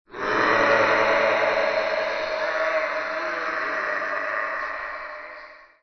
A bunch of zombies! Before running away from the Zombie Apocalypse, I took out a recorder and recorded these really hungry zombies.
No, it's just multiple layers of me acting like a fool in front of a microphone. Recorded with a CA desktop microphone.